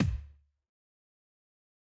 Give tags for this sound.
One-shot
Dance
Trance
shot
Drum
One
Kick

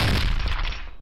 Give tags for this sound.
M406-HE
field-recording
grenade
urban

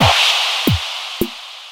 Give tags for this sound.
drumloop
loop
140-bpm
electro

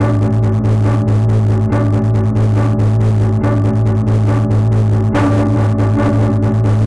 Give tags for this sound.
drums
filter
free
guitar
loops
sounds

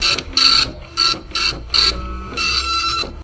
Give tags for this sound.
ambient
printer-loop
printer
effect

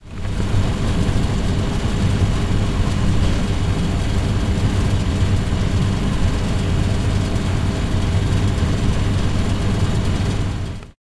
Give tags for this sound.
campus-upf
fountain
UPF-CS12

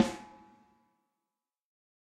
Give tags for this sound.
14x6
accent
audix
beyer
breckner
combo
drum
drums
dynamic
electrovoice
josephson
kent
layer
layers
ludwig
mic
microphone
microphones
mics
multi
reverb
sample
samples
snare
stereo
technica
velocity

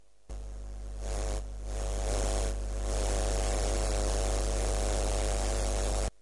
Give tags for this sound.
electric
noise
electronic
signal
cable
machine

